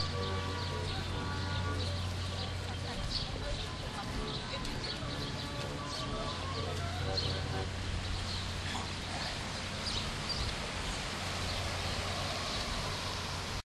washington carousel
The carousel across from the Smithsonian Information Center on the National Mall in Washington DC recorded with DS-40 and edited in Wavosaur.
travel, field-recording, washington-dc, carousel, summer, vacation, road-trip